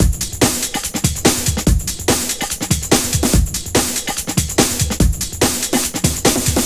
Hardbass
Hardstyle
Loops
140 BPM
Loops, Hardstyle, Hardbass